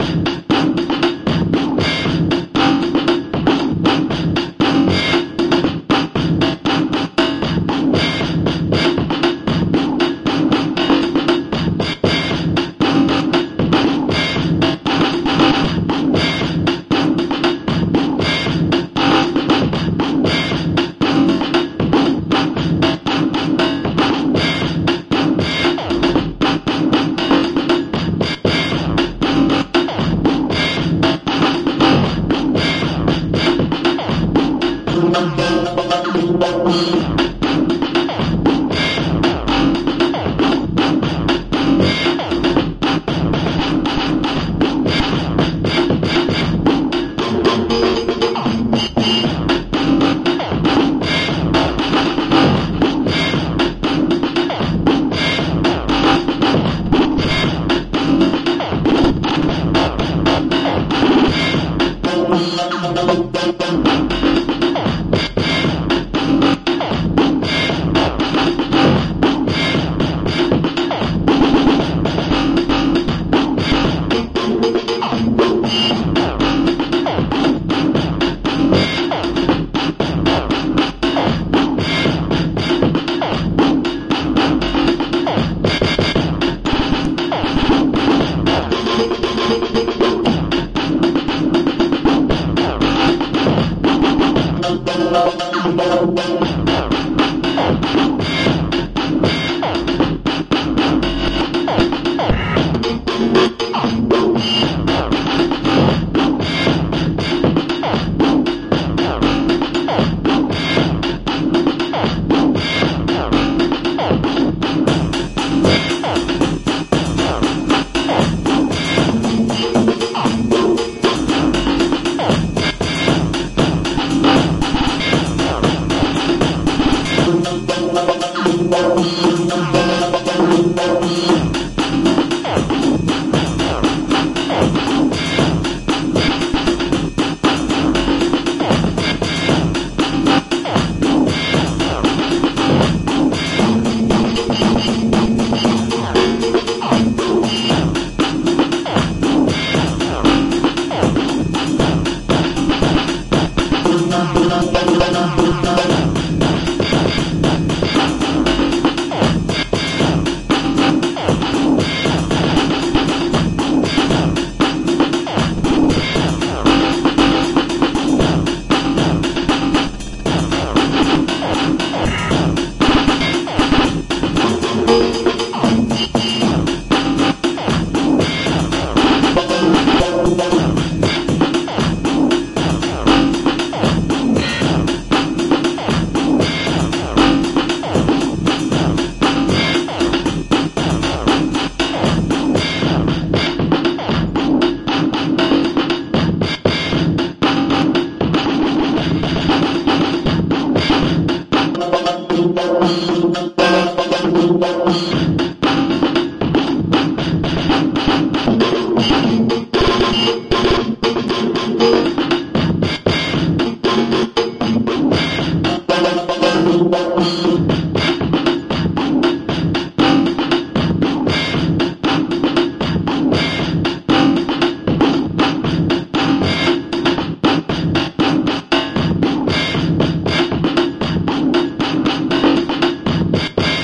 barbarian looper
groove, loop, rhythm, quantized, rubbish, drums, drum-loop, beat, breakbeat